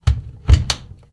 washmachine close2
close the door at a AEG washing machine